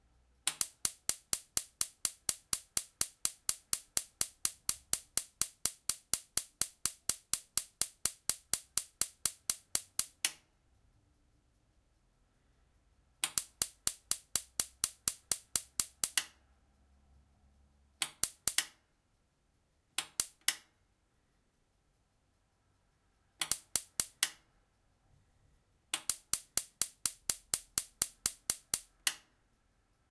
Kitchen gas stove electric igniter
Classic electric igniter of the 70s kitchen gas stove.
gas, electric, ignition, household, kitchen, igniter, stove, discharge